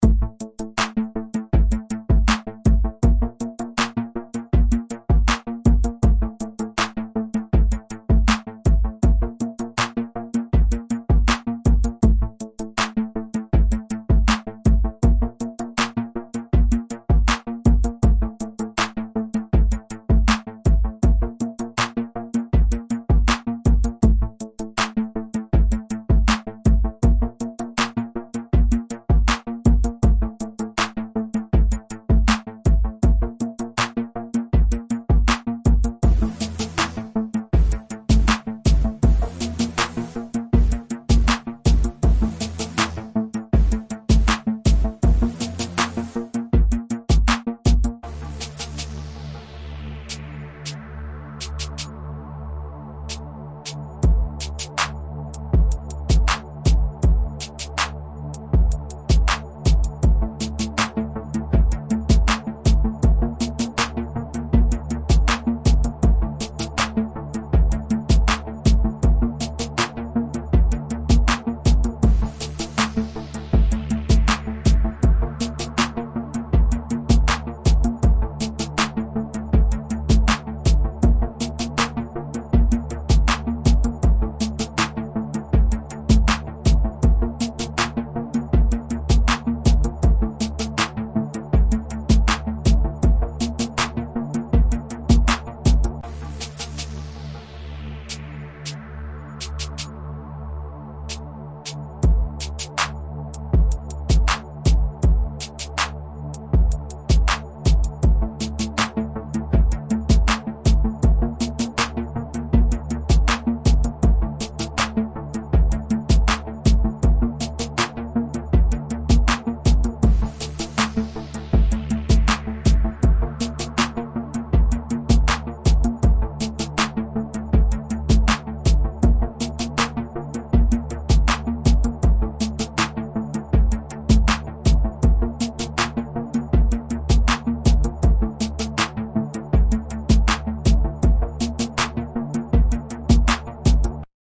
Dark forest
atmosphere, background, beat, drums, hiphop, music, sample, sound, soundscape, stereo